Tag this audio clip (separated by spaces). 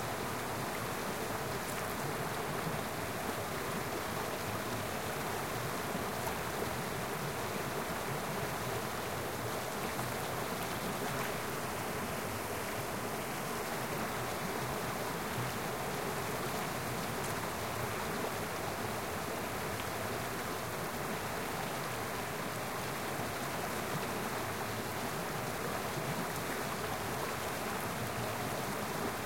water nature stream H5 fall Zoom Field Recording waterfall